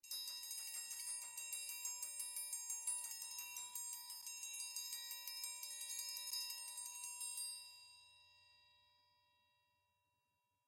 One-shot from Versilian Studios Chamber Orchestra 2: Community Edition sampling project.
Instrument family: Percussion - Metals
Instrument: Triangle
Articulation: roll
Room type: Band Rehearsal Space
Microphone: 2x SM-57 spaced pair
percussion, single-note, vsco-2